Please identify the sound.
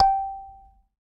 SanzAnais 79 G4 -doux b
a sanza (or kalimba) multisampled